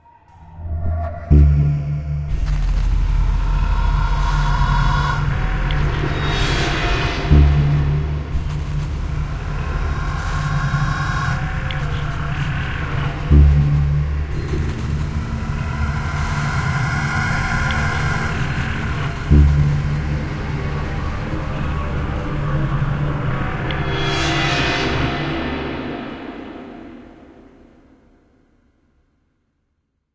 ftz Lapaura05
Some Soundscapes to scary your little sister or maybe for movies or games.
Used:
Kontakt 4, Roland JV1080, Kore Player, Alchemy Player, BS Engine, UVI Workstation, few
Samples from MusicRadar and WorldTune
score, dramatic, halloween, Soundscape, dark, scary, fear